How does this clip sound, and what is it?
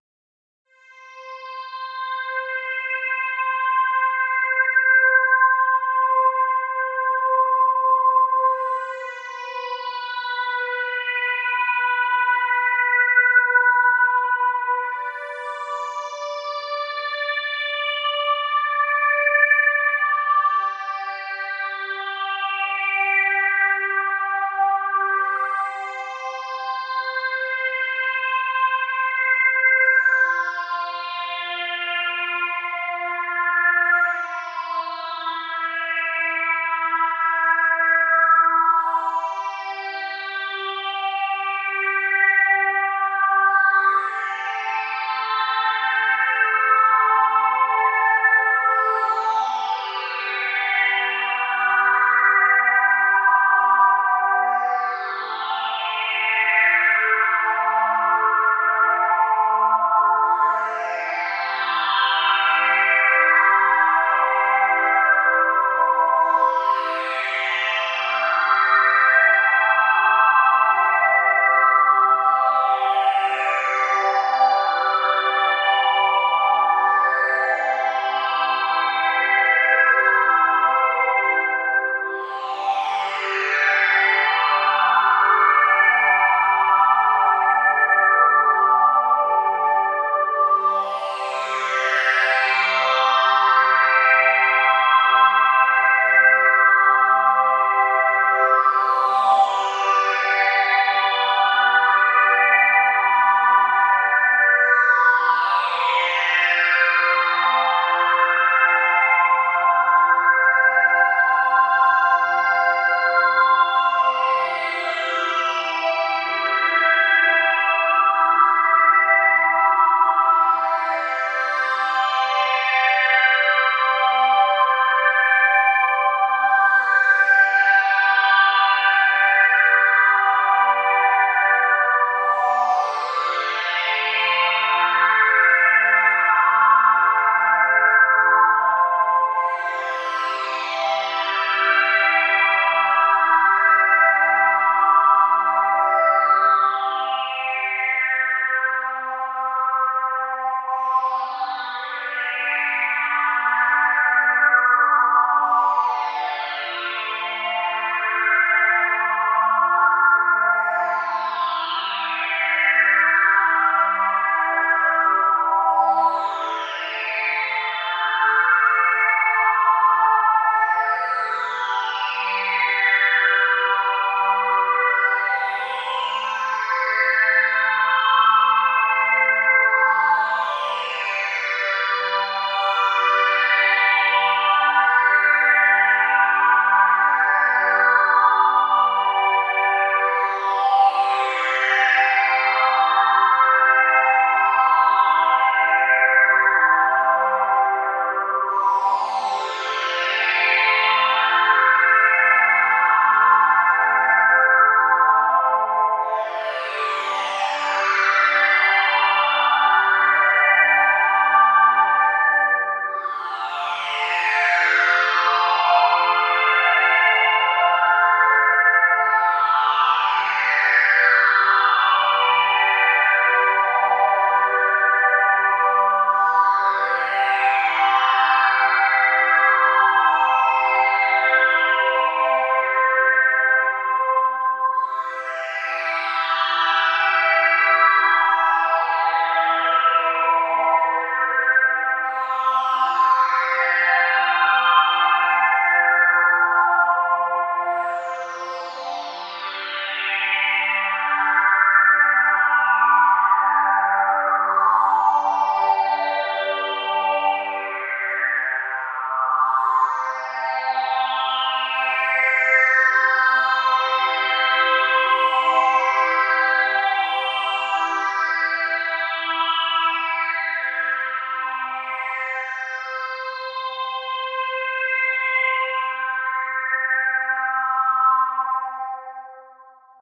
Synth tones 2
Playing my keyboard, sequential notes with Garageband effects. Used it for background for narration of a video about the universe.
calm, keyboard, slow